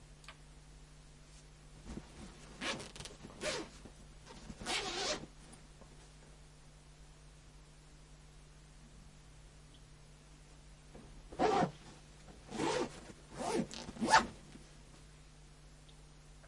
Laptop case - Unzipping zipping 01 L Close R Distant
Unzipping and zipping a medium sized soft laptop case. First take is unzipping, second take is zipping. Recorded in studio.